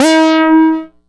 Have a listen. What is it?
multi sample bass using bubblesound oscillator and dr octature filter with midi note name